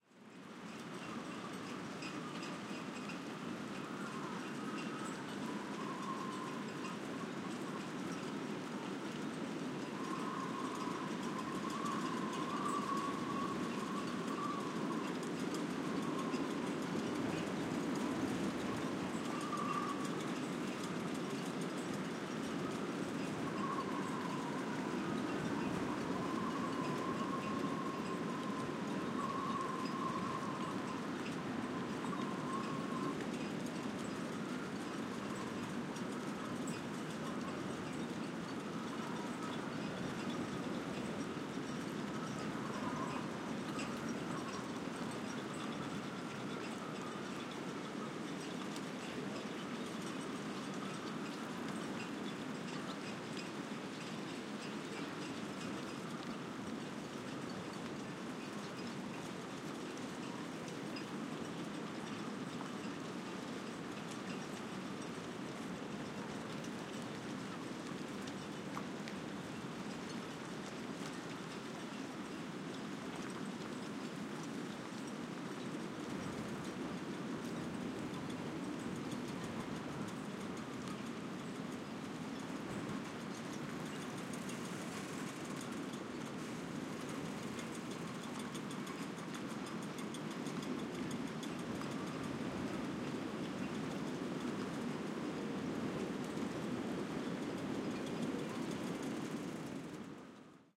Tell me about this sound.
Calm wind at a marina, rattling at masts and rigging of the yachts.
Calm wind at a marina, the metal masts and rigging of the sailing boats rattle while they sway in the water - almost reminiscent of cowbells.
there is a recording of the same marina with stronger wind.